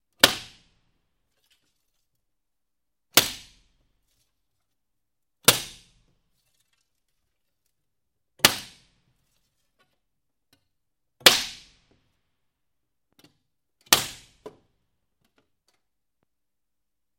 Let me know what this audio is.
Windows being broken with vaitous objects. Also includes scratching.
indoor, breaking-glass, window